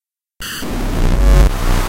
STM1 some bass 5
Over processed deep bass. Another variation of some_bass_3 and 2.
bass, distortion, static